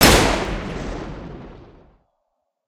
Single Gunshot 2
A single, loud gunshot with a lot of distorted echo created with Audacity.
Gunshot, Rifle, Sniper